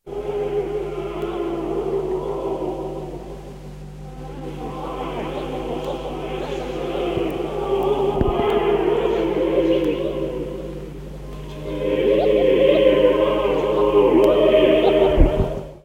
Wobble Choir 1
Found on an old Tascam 244 tape, fuzzy choir sounds. Possibly recorded from a knackered Echoplex tape, I can't recall.
tape, found